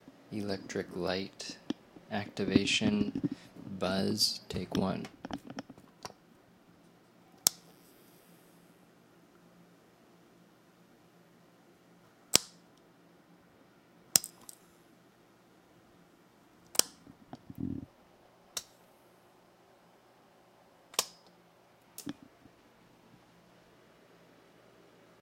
Electric light activation buzz y switch
Turning on a light bulb via switch. Rode NTG-2, Tascam-DR-60D
electrical-buzz, light-swith, on-off